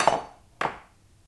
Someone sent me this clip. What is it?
Placing a spoon down on kitchen work surface
drop, spoon, kitchen